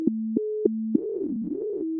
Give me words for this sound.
I took some waveform images and ran them through an image synth with the same 432k interval frequency range at various pitches and tempos.